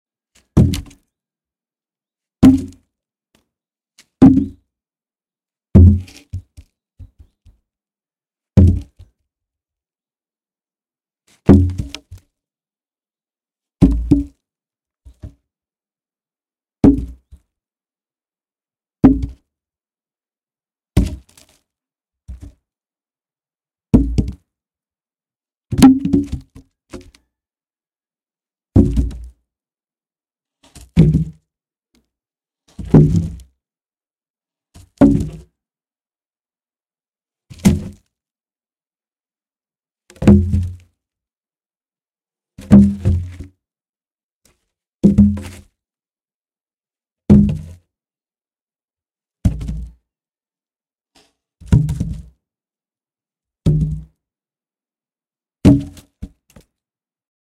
Throwing logs of wood on a small pile. Choose the right sound snippet for your purpose.